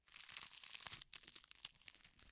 Flesh, intestines, blood, bones, you name it.